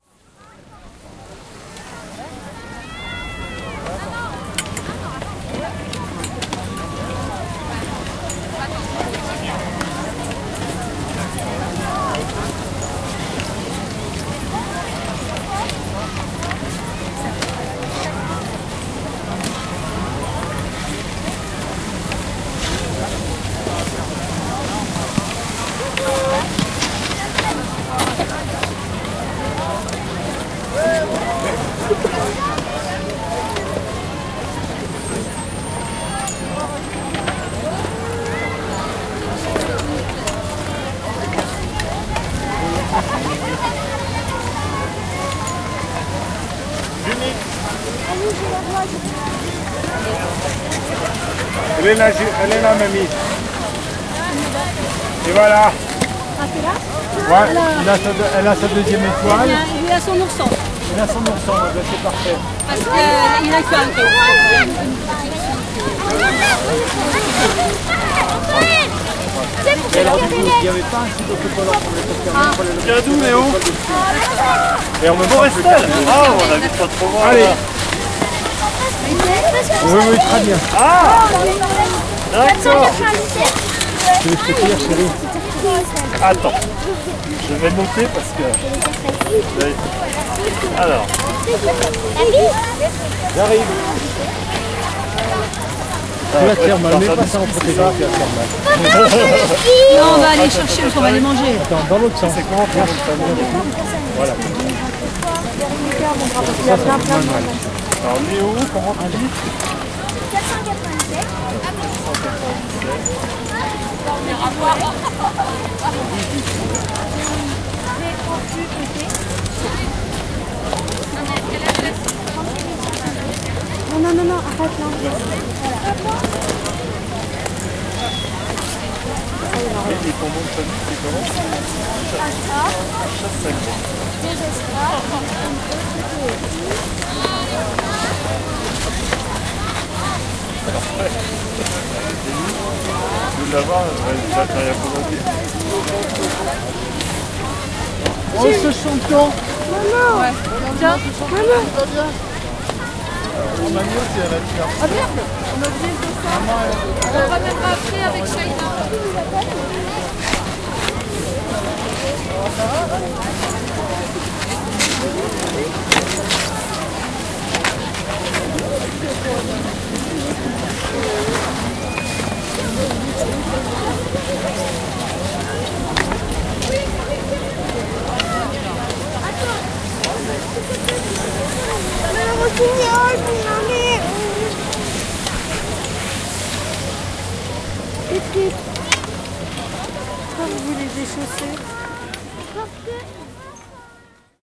Ski resort-main kids gathering area 2
A field recording of the main gathering area of a french Alps ski resort. Peolpe chatting, people walking on snow, french language, kids, winter sports atmosphere. Recorded with a zoom H2 in X/Y stereo mode.
crowd, ski, field-recording, snow, zoom-h2, mountain, Alps, french-language, winter-sports, French-Alps, Ski-resort, kids, winter